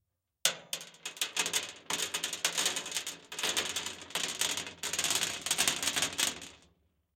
throwing pebbles onto metal12
Contact mic on a large metal storage box. Dropping handfuls of pebbles onto the box.
clack, clacking, contact-mic, gravel, impact, metal, metallic, pebble, pebbles, percussion, percussive, piezo, rocks, rubble, stone, stones, tap, tapping